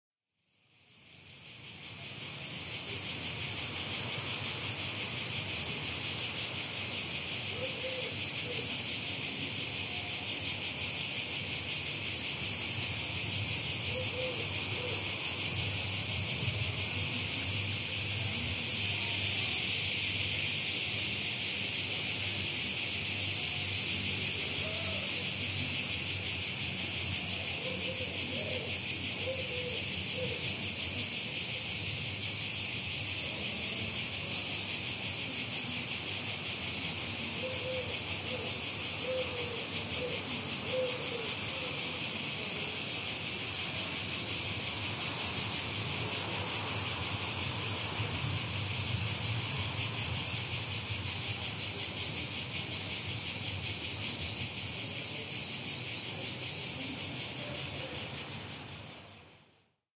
summer atmosphere full of cicadas recorded with a Rode videomic and a Rode NTG-1, average level about -12db